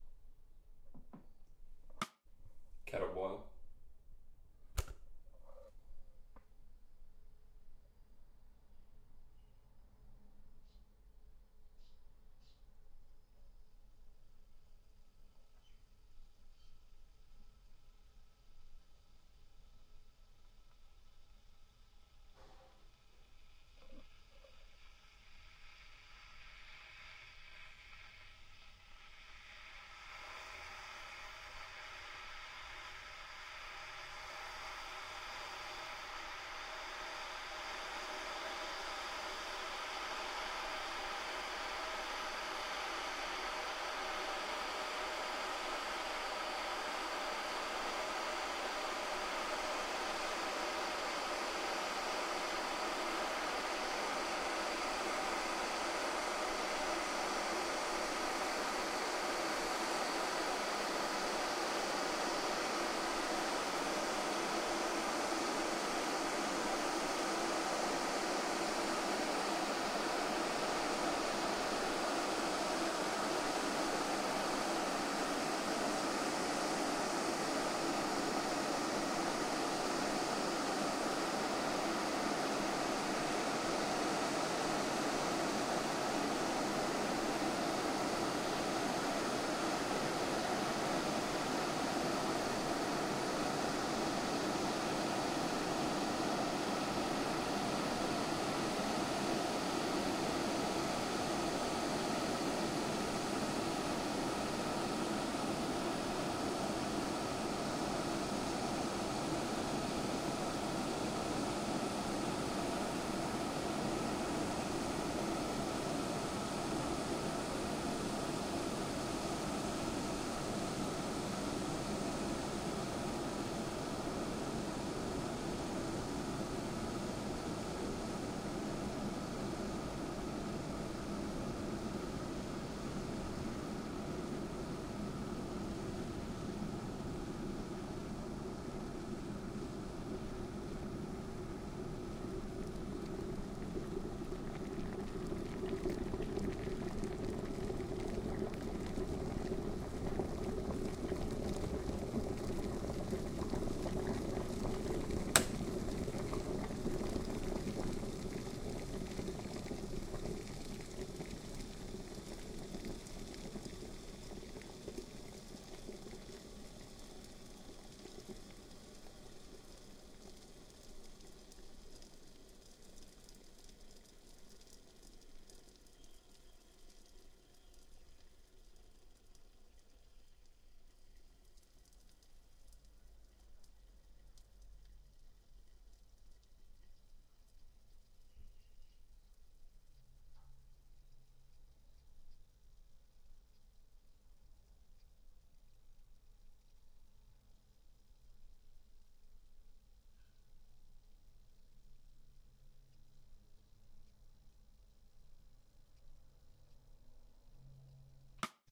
KETTLE BOIL L
Stereo Recording of A Kettle Boiling_L_Some Background noise
Kettle, boil, boilingwater